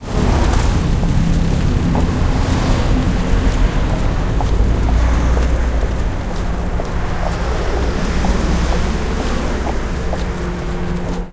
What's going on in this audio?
0101 Footsteps with traffic
Traffic and footsteps
20120118
traffic, korea, field-recording, footsteps, seoul